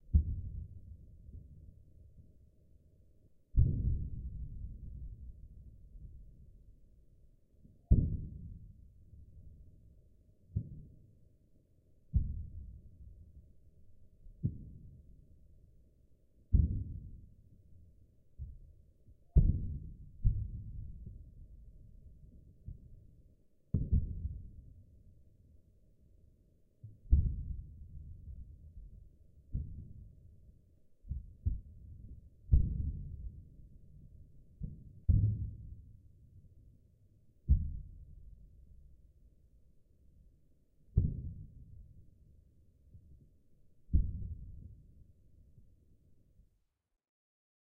Snapping Shrimp Slowed 32X
The sound of the snapping shrimp in the sample noted above in the remix field slowed 32 times. BOOM! Subwoofers on.
boom, snapping-shrimp, slow, underwater, bang, marine, bass, depth-charge, sub, experimental, explosion